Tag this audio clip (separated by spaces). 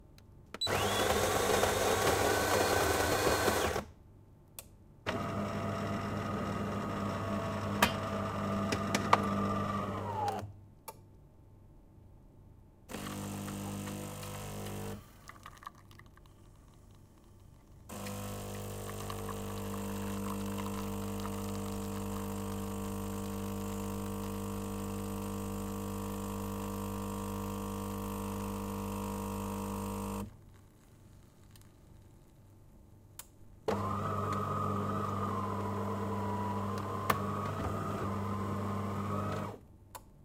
noise kitchen